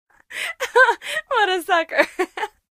Woman Laughing-Saying 'What a sucker!'
Authentic Acting of Laughter!After a practical joke!
Check out our whole Laughter pack :D
Recorded with Stereo Zoom H6 Acting in studio conditions Enjoy!